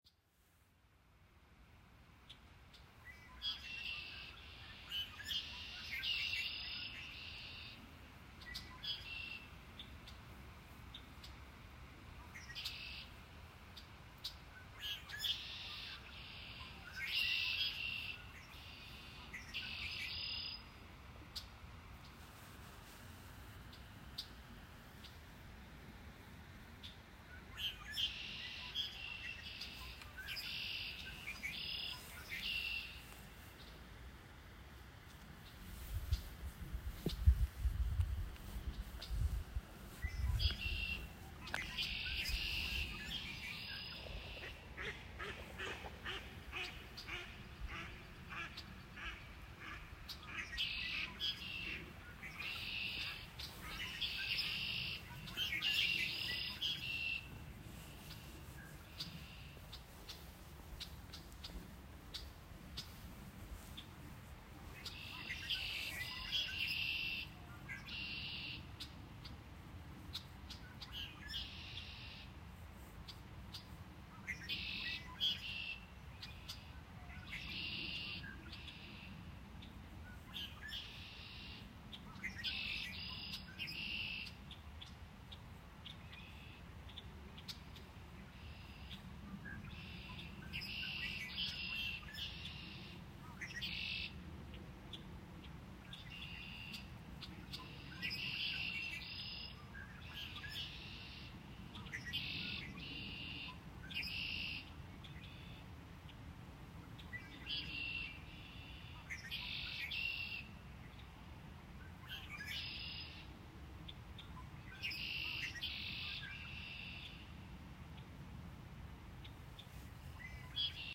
Bird Calls on Old Sib
Bird calls I recorded while strolling on a suburban road in late spring. Various types of birds with some rushing water, wind, cars, and movement noise in the background.
Recorded using Voice Memos on an iPhone 12 Pro.